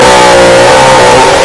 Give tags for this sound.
beast
fnaf
jumpscare
scary

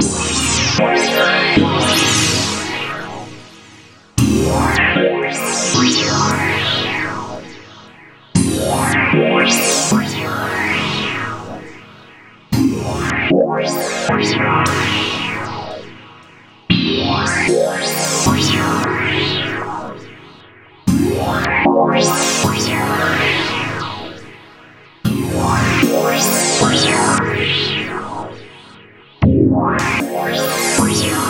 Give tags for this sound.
atmosphere
dreamy
lucid
shine
synth
vsti

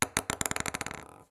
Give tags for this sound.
rhythm
table
bizarre
compact

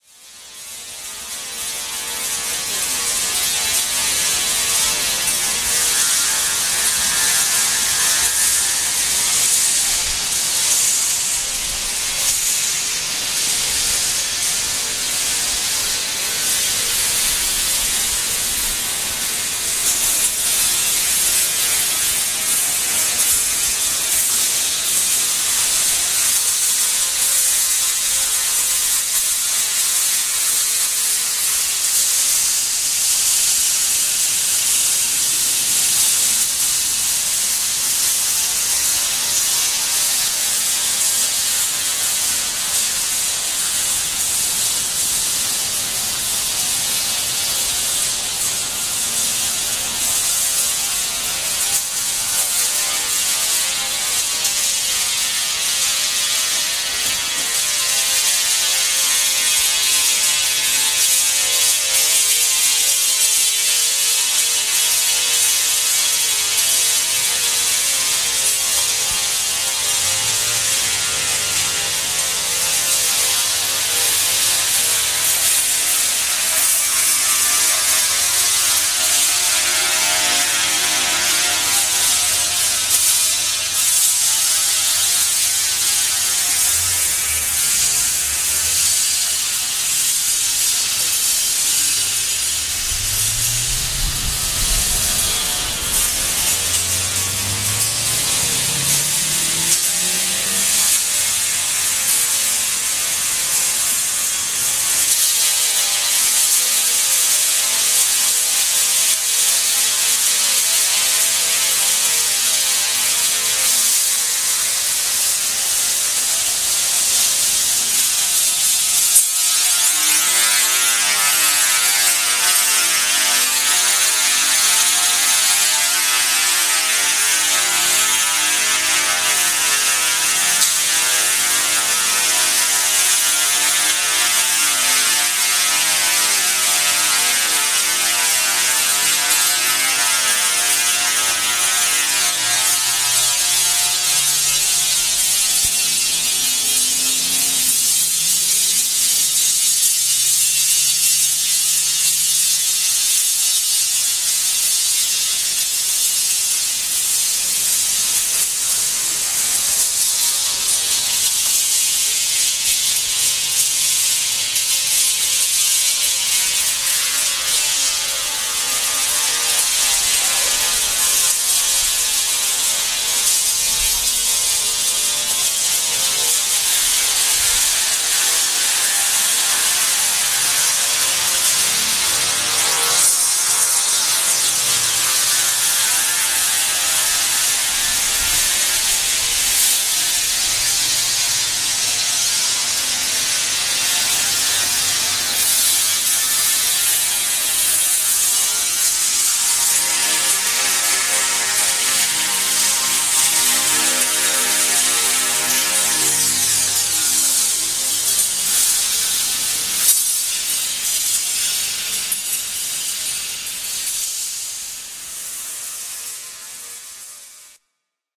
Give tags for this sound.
20th
ambient
Aon
art
artist
bell
Bertoia
Center
century
Chicago
field-recording
Harry
industrial
metal
metallic
overtone
overtones
percussion
ping
ring
ringing
rod
Sculpture
shimmer
sonambient
sound
Sounding
struck
unfolding
vibrate

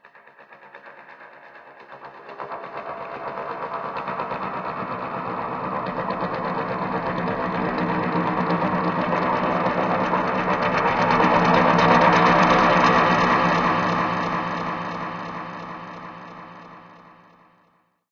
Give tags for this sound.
horn
drone
dark
phantom
deep
thrill
terror
haunted
weird
terrifying
scary
fade
resonance
transition
didgeridoo
horror
ambient
creepy
wave
drama
suspense
dramatic

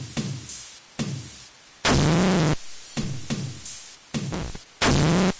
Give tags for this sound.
bending
bent
circuit
glitch
slightly
toyed

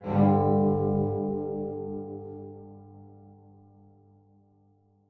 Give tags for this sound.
house
ping
quality

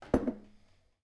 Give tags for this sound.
kitchen
jar
drum
tap
sound
hit
percussion

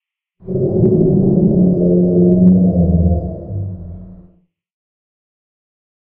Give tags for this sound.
monster
shout
wilhelmscream

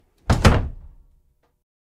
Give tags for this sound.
door
closing
wooden
slamming

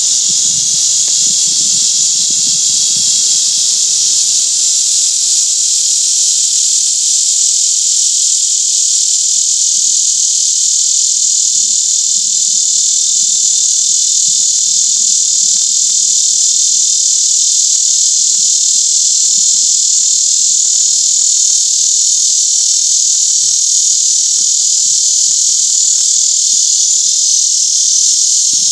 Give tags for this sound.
insects
State-Park
IRL
trail
bugs
Indian-River-Lagoon

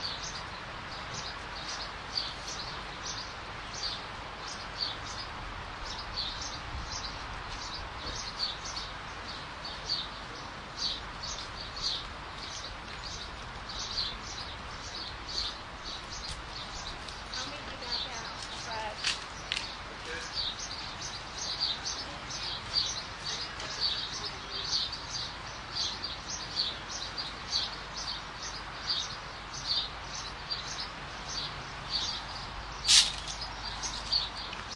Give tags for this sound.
field-recording
road-trip

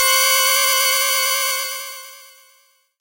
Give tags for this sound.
chord
dissonant
multisample
ppg

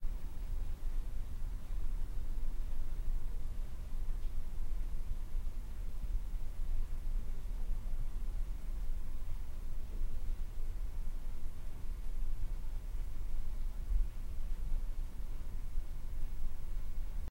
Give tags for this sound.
background
tone
apartment
ambience
ambient
atmosphere
room
background-sound
ambiance
department
white-noise
interiors
living

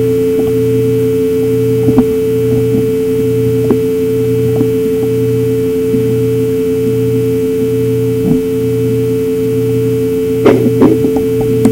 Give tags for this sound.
wating
call
loud

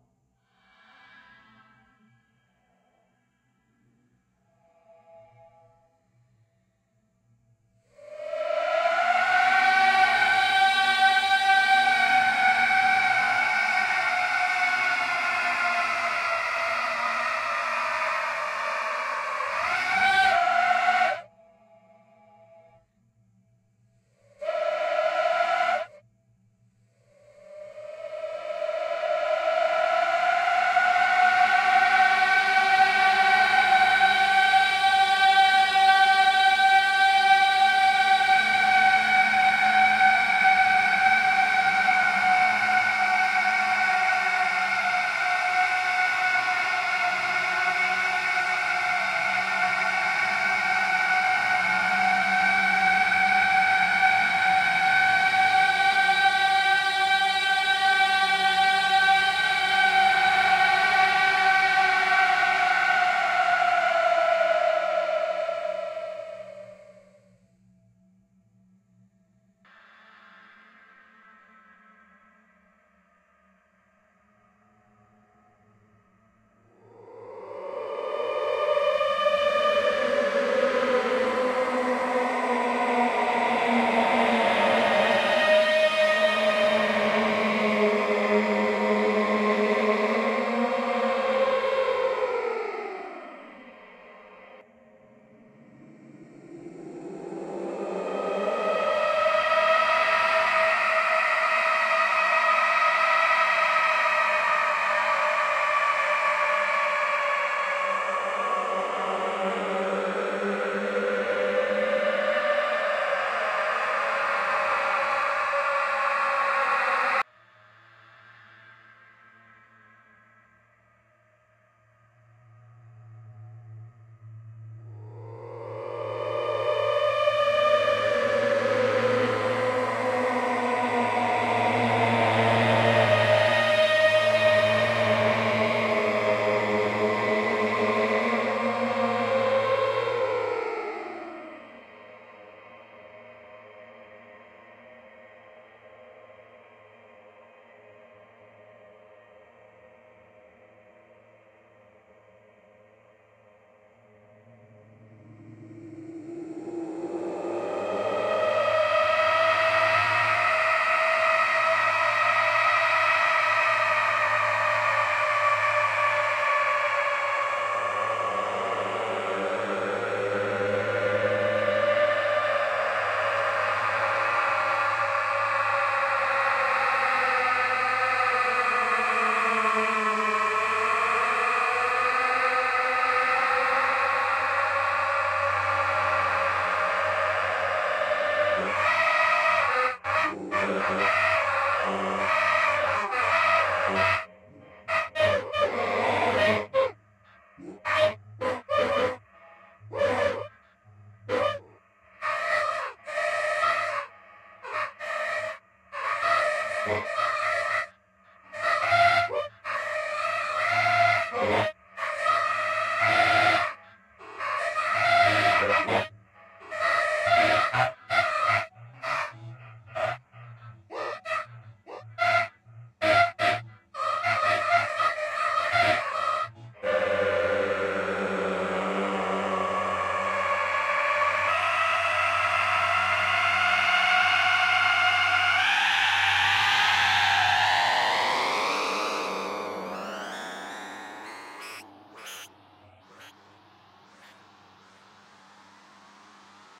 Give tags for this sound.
human
processed

